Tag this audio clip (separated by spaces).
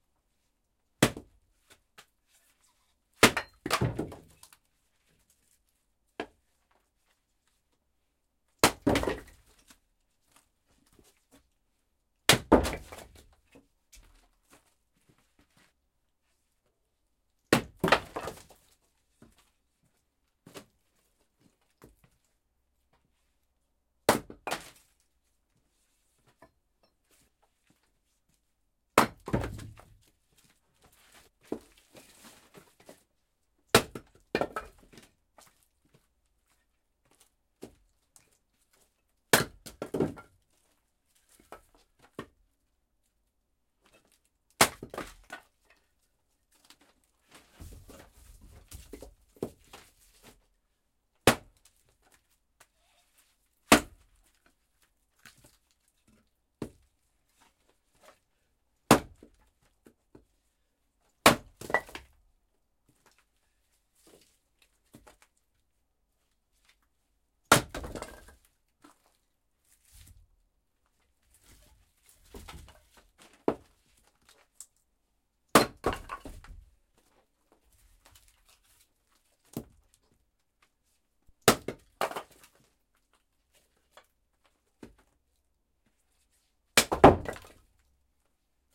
wood firewood chop axe logs